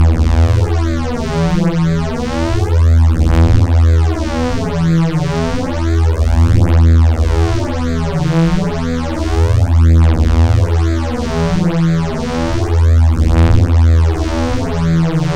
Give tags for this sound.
detune reese saw

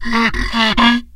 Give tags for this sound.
daxophone friction idiophone instrument wood

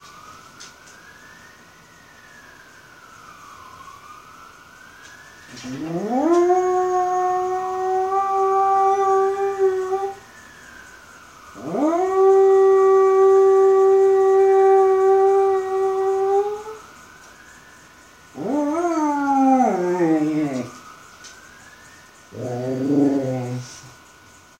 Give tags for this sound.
alarm dog groan howl moan siren sirens

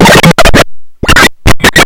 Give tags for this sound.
bending circuit-bent coleco core experimental glitch just-plain-mental murderbreak rythmic-distortion

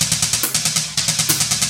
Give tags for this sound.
noise experimental electronic industrial

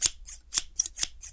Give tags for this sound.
cut cutting scissor scissors

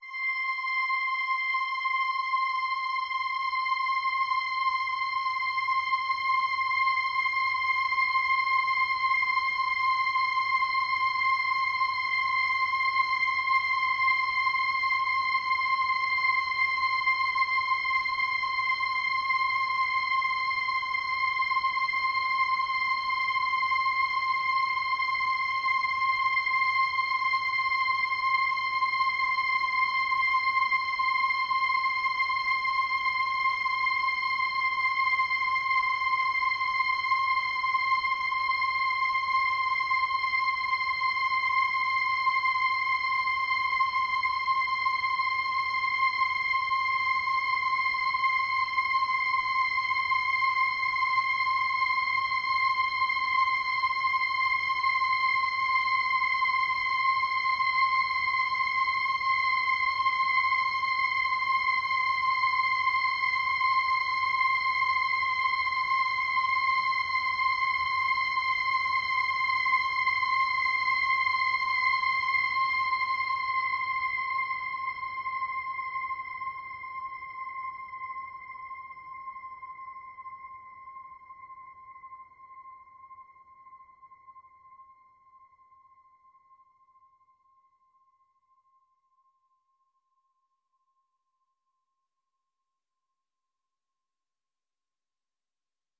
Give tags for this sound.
ambient drone multisample pad

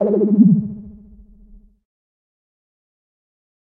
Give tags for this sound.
beam jingle game space effect

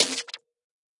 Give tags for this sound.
kit sounds hits drum noise idm techno samples experimental

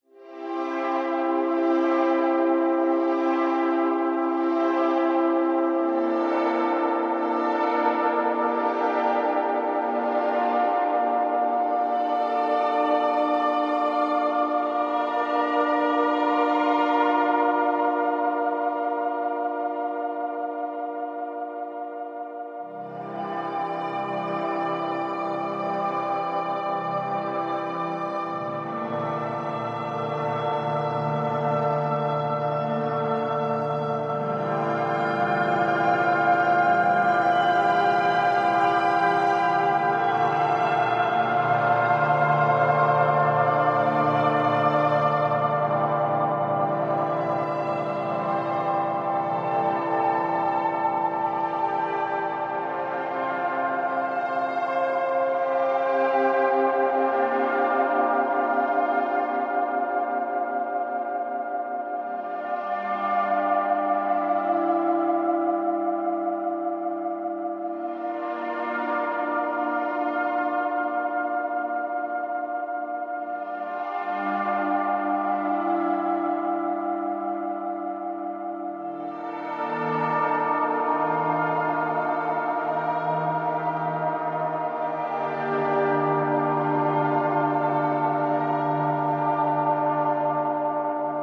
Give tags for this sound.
Eternity Love Beauty Universe Violin Passion Cinematic Life

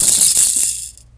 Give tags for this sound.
convolution impulse ir response reverb

